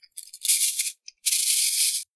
More moves with the Cabasa (percussion instrument with a wooden handle and metal beads that wrap around it on a metal band) that make this scraping sound when the handle is turned.
beads, instrument, shaker